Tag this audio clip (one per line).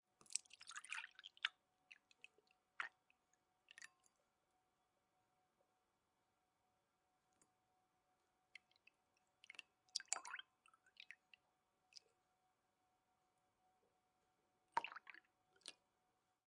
Panska
CZ
Pansk
Czech